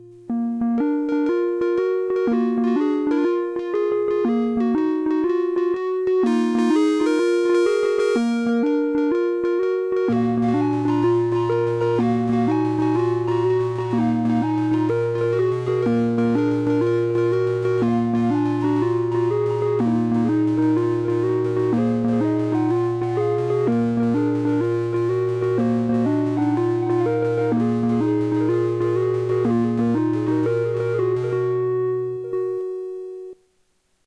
minibrute test 1
Loop created with an Arturia Minibrute July 8, 2019, using Audacity. Sub Osc. Key of B flat.